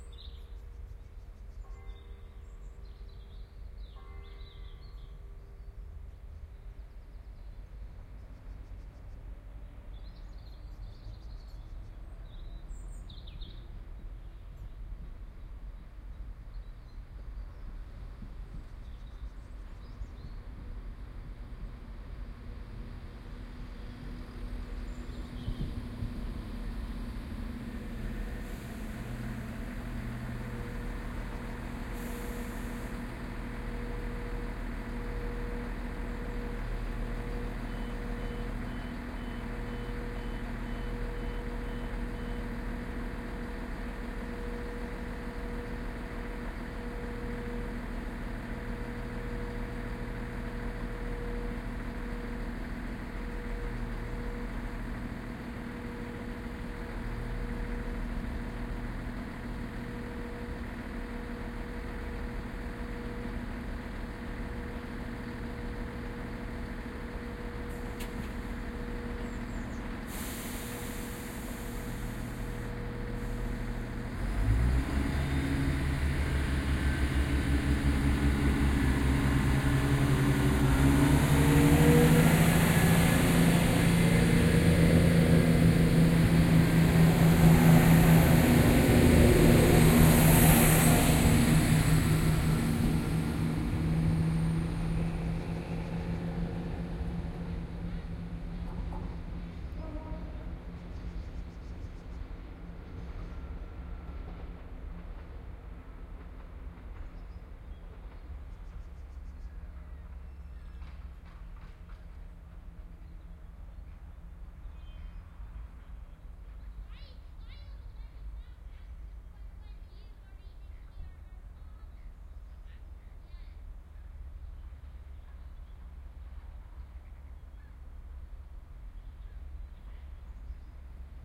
Train arriving Pitlochry
Another of those train arriving and departing recordings, done on Pitlochry station, where I never arrived with the train. Great secondhand bookstore on the platform.Soundman OKM microphones, A3 adapter into R-09HR recorder.
binaural, field-recording, pitlochry, scotland, station, train